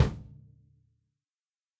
Rogers1968FulltertonEraHolidayRockKitKickBD20x14
Toms and kicks recorded in stereo from a variety of kits.
acoustic, drums, stereo